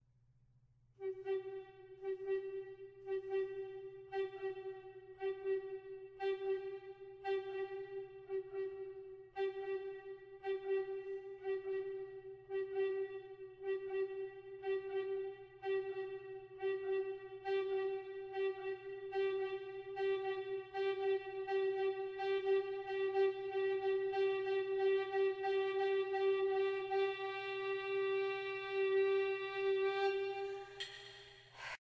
I made this using my alto recorder. It is quite long but can easily be shortened. I just realized that I forgot to take the breath sound out at the end!! Sorry! I will do better next time. I like this site and am happy to finally be able to contribute to it. Enjoy!